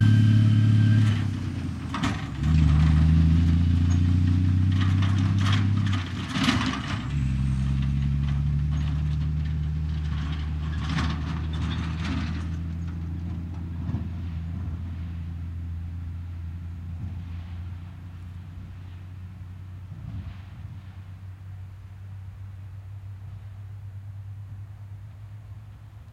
Big Truck Away FF659
Truck, big truck away
Big-Truck, Truck-Driving-Away, Truck